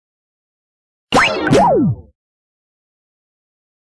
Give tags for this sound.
machine; charge; galaxy; static; voice; power-down; film; energy; game; artificial; FX; power; space; deflate; starship; shutdown; down; electronic; robot; computer

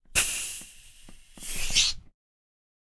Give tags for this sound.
Gas; Valve; Pump; Pressure

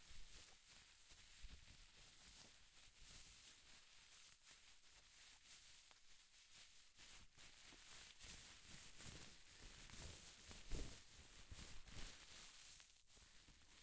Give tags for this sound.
shore; sand; beach

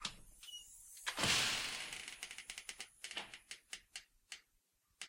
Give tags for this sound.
house; foley; fly-screen-door; door-screen-door

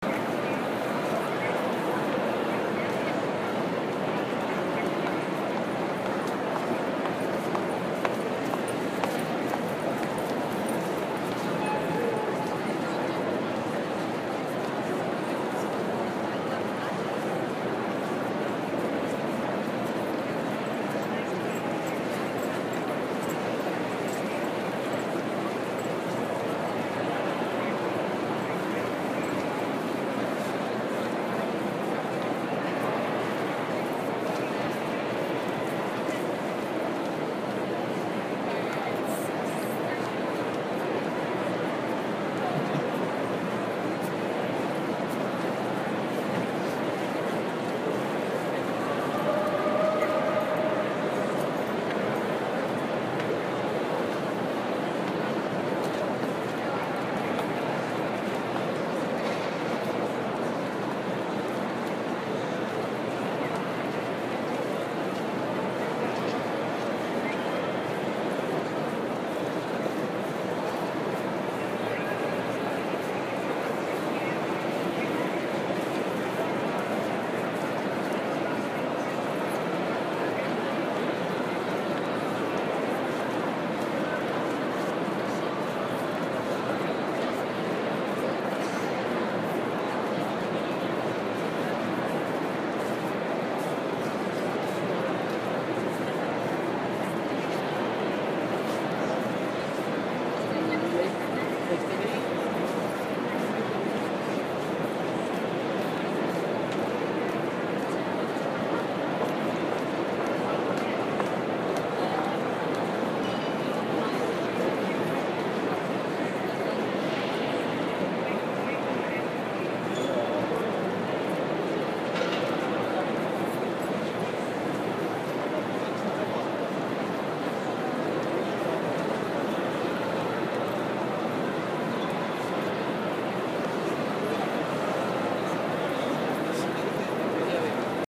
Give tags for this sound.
grand-central; new-york; train-station

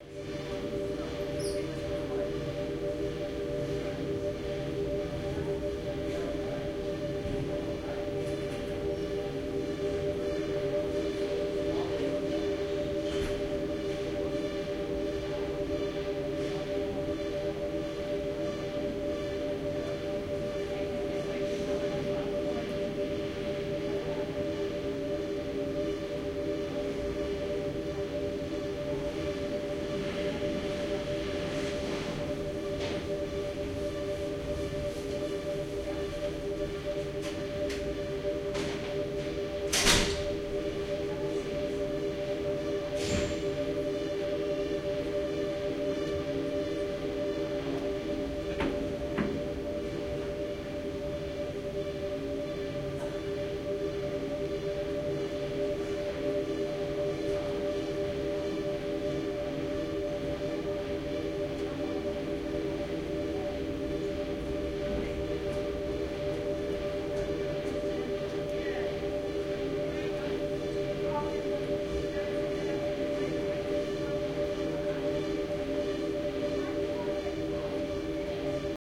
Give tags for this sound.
ambience; atmosphere; Cruiseship; footsteps; soundscape; stairway; voices

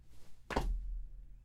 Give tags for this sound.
foot; jump

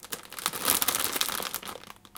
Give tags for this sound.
crack; scrunch; crunching; cookie; cracking; crunch; random; crackling

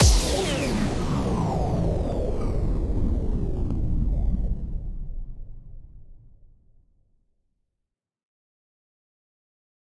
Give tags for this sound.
blip; effect; game; sfx; sound; sound-effect; videogame

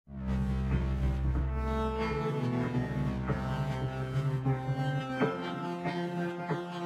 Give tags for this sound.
ambient; background; d; dark; dee-m; drastic; ey; glitch; harsh; idm; m; noise; pressy; processed; soundscape; virtual

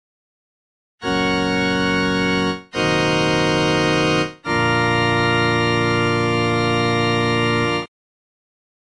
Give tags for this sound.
church; organ; end; music; tune

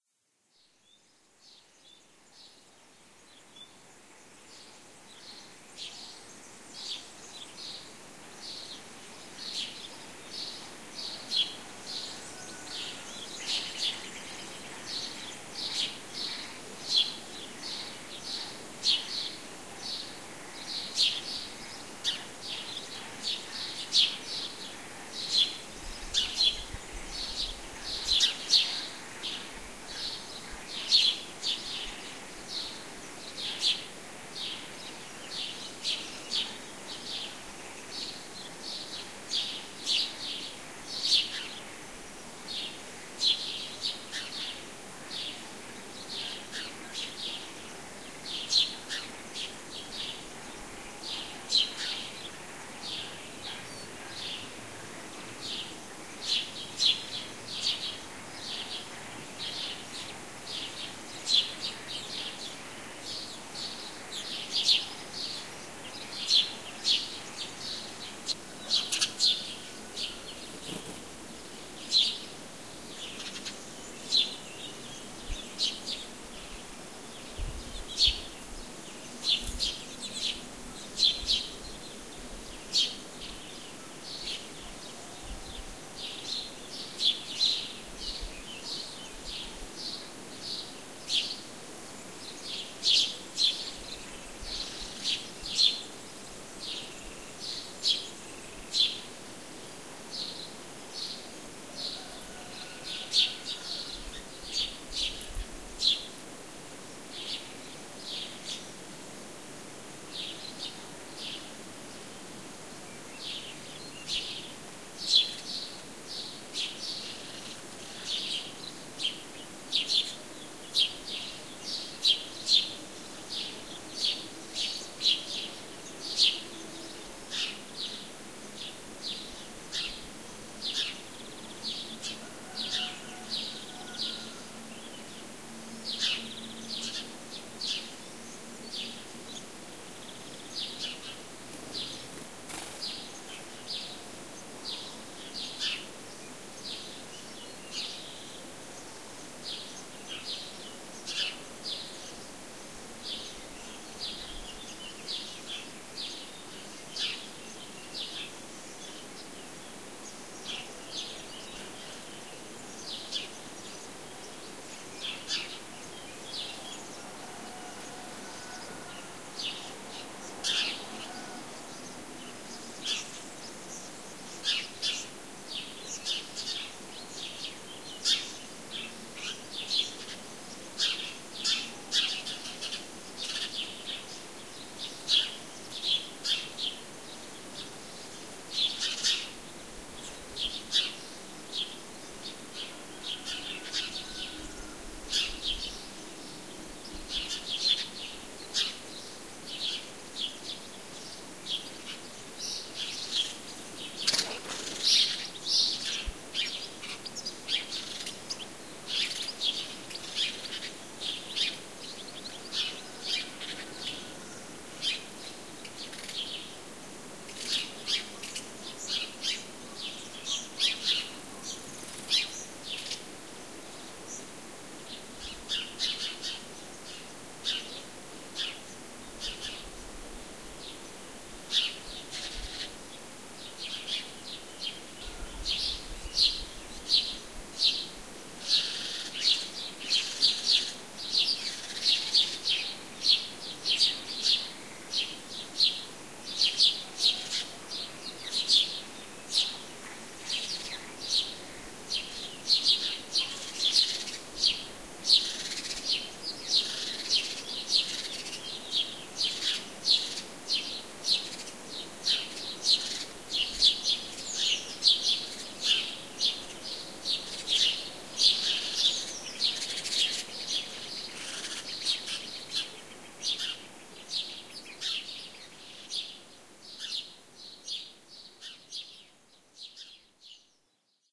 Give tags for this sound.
dawn; field; portugal; recording; village

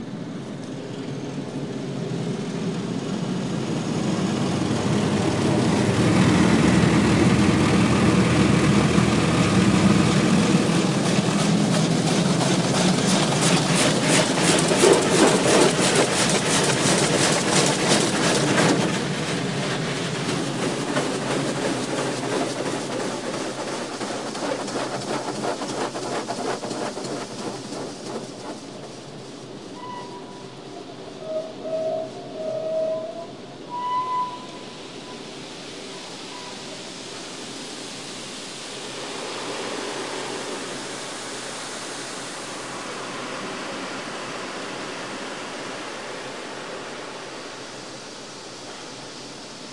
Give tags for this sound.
car; loud; spray; wash; water

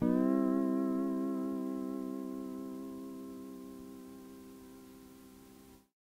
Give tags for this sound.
collab-2; guitar; Jordan-Mills; lo-fi; lofi; mojomills; slide; tape; vintage

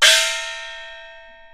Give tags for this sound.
beijing-opera; qmul; icassp2014-dataset; chinese; gong; china; xiaoluo-instrument; percussion; compmusic; peking-opera; chinese-traditional; idiophone

